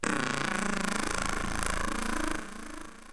digital windbreaker
digitally recorded fart sound from mouth, processed, too much time on my hands!!!!
live, recording, sounds, processed, mic